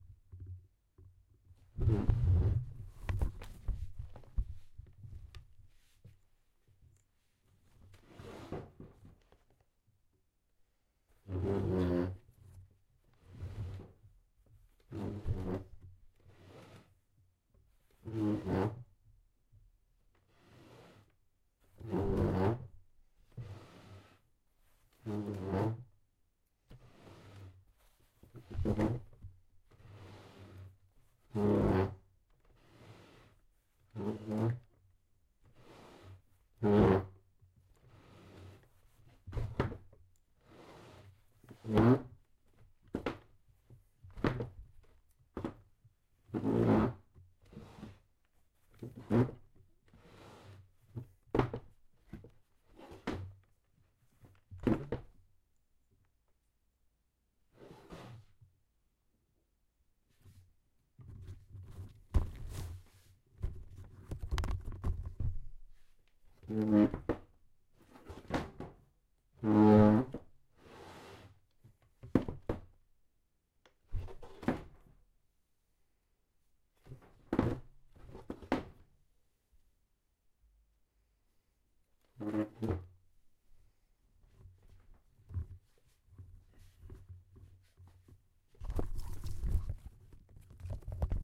Chair moving
Chair, Creak, moving, Squeeky, Wood